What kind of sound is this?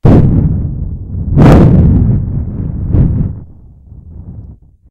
Quite realistic thunder sounds. I've recorded them by blowing into the microphone
Lightning, Loud, Storm, Thunder, Thunderstorm, Weather